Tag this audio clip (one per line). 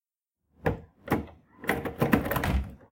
field
recording
CellPhone